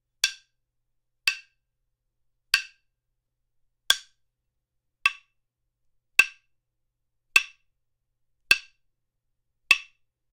A pair of good Australian aborigine Clapsticks
clapsticks, percussion